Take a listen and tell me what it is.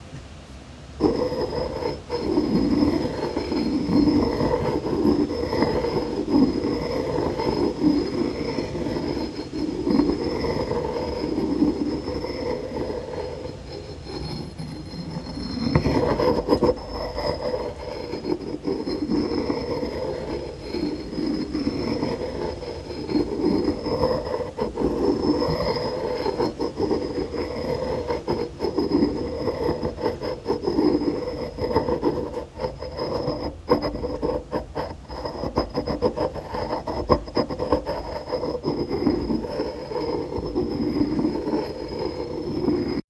Stone rolling on stone pestle & mortar
a pestle and mortar scraping against each other. stone on stone. recorded on a rode ntg3.